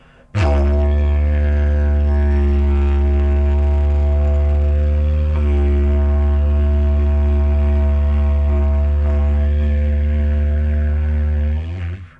Didg Drone 2
Sounds from a Didgeridoo
woodwind, australian, aboriginal, indigenous, didgeridoo